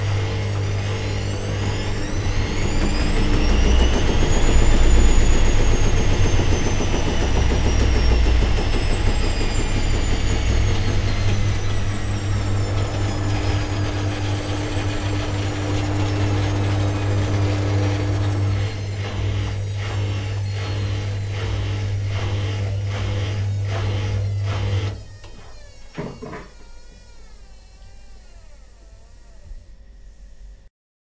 washing machine moderate spin, washing machine wash
drum, machine, rinse, spin, wash, washing